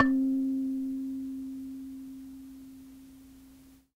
Tape Kalimba 1
Lo-fi tape samples at your disposal.
collab-2, Jordan-Mills, kalimba, lo-fi, lofi, mojomills, tape, vintage